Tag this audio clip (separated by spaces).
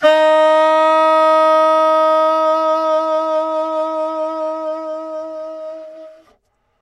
vst sampled-instruments woodwind saxophone baritone-sax jazz sax